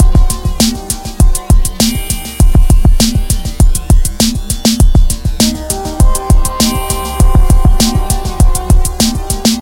B03 4measures 100bpm 4-4
4 measures 100bpm 4-4 electronic drum beat. Made with Native Instruments Battery and Massive. A Moog Modular sequence was also used.
4measures, drum, 4-4, electronic, 100bpm, beat